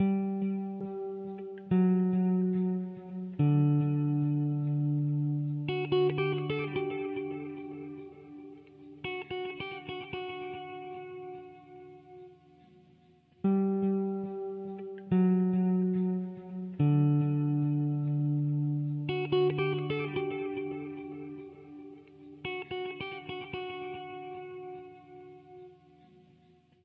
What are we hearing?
Laba Daba Dub (Guitar)
Roots Rasta DuB